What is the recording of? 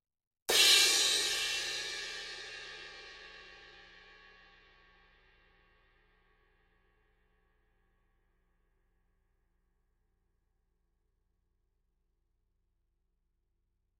Zildjian 18" Dark Crash Softer Hit - 1989 Year Cymbal
Zildjian 18" Dark Crash Softer Hit